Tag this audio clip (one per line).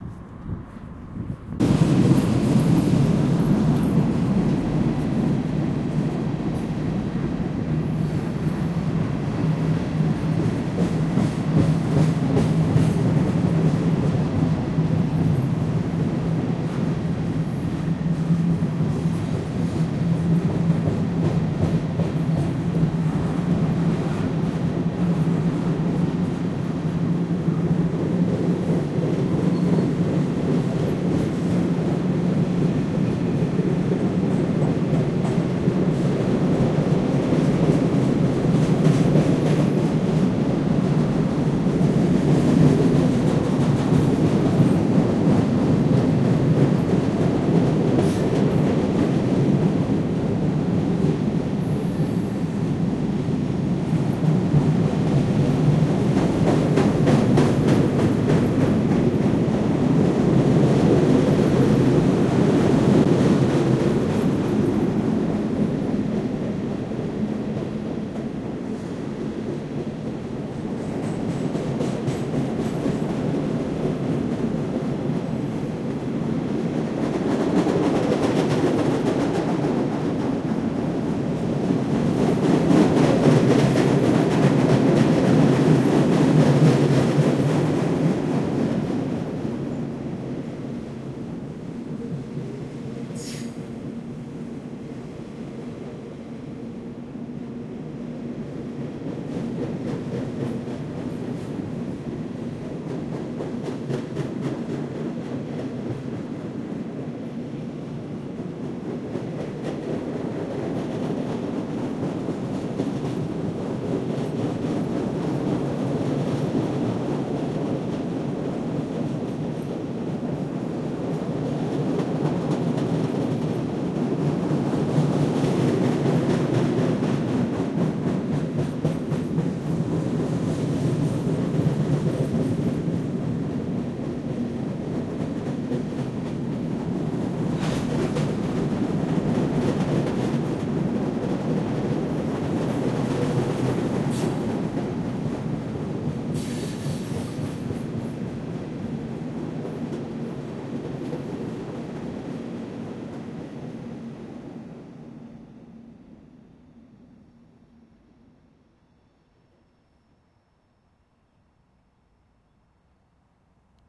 iron
bridge
machinery
vibrations
railway
electric-train
railroad
momentum
pass
weight
riding
freight
diesel
rail
wheels
metal
locomotive
passing
rail-way
speed
engine
fast
vehicle
overpass
rail-road
heavy
clatter
train